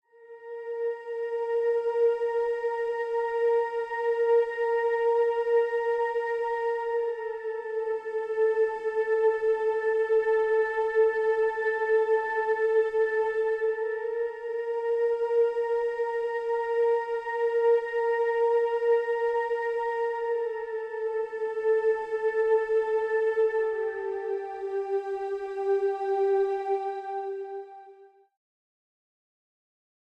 vocal-3c-72bpm
A female vocal.